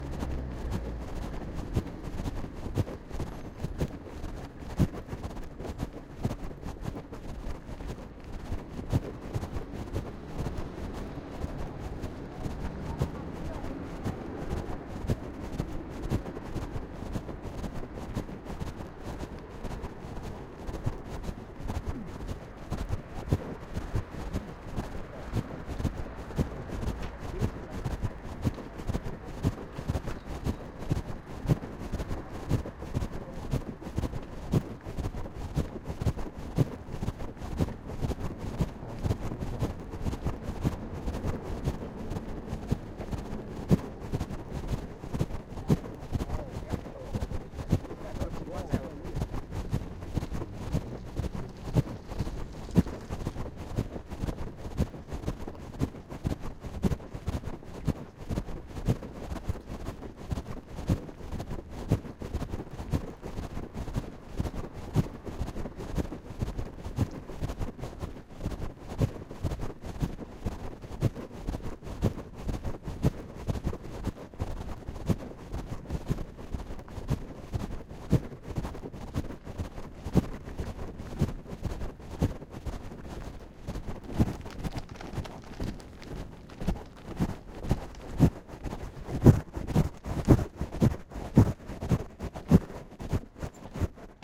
Recorder in pocket
Recorder moving with a moving body that has it in a pocket. Recorded with a Zoom H2.